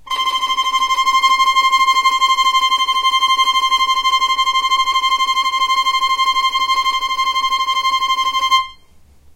violin tremolo C5
violin, tremolo